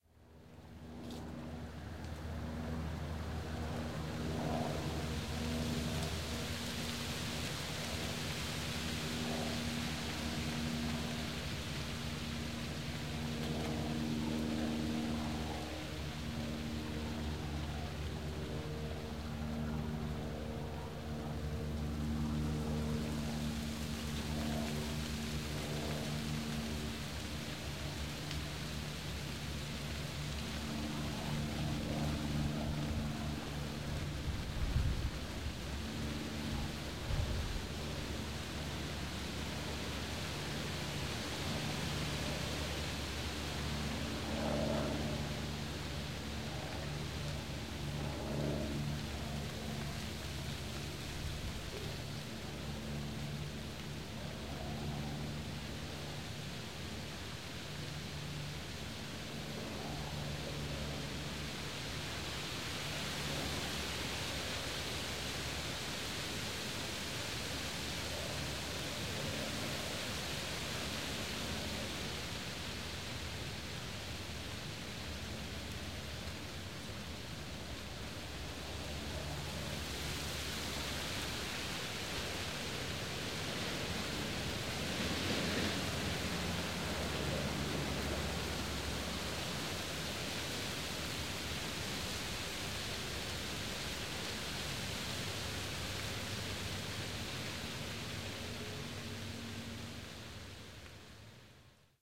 Autumn is here and, to me, one of the most soothing of Fall sounds is the wind blowing through the leaves of one of my favorite cottonwood tress, ALONG with the lazy, nap-inducing sound of a propeller-driven plane slowly floating through robin-egg blue skies.
This recording may sound a bit raw, because of the wind, but, I think it is nice to listen to.
This was recorded using my Handy Zoom H4-N recorder and the microphone that I used is my trusty Rode NTG-2 shotgun microphone.
peaceful, leaves, droning, wind, autumn, plane